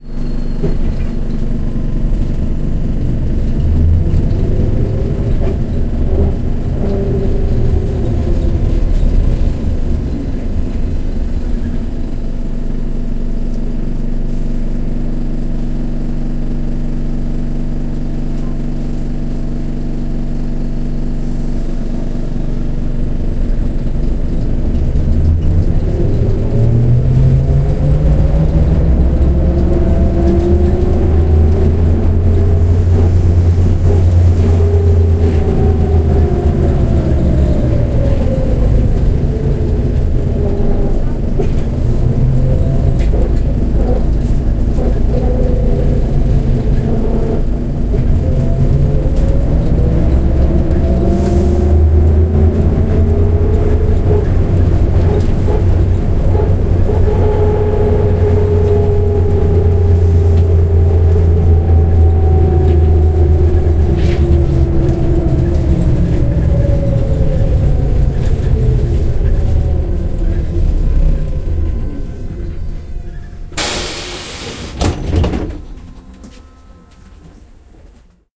Trackless Trolley
Recording inside the trolleybus during its movement.The recording was made on a smartphone Xiaomi Mi 10t Pro.
electric, transport, trolleybus, urban